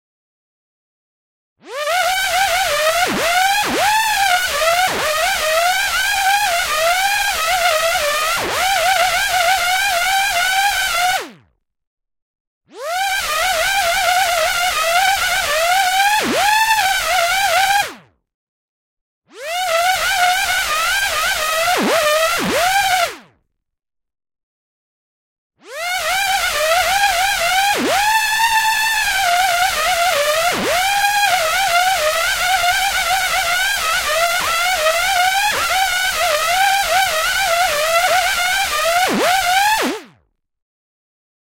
Just the output from an analog box circuit I played with for only half an hour or so to try to get the overall feeling of how it sounds when the dentist is drilling into your head. Wavering is overdone, etc., but it was just an experiment. NOT A REAL RECORDING. Why? Well, it was based on a discussion on the forum where no one seemed to be suggesting anything that filled the void.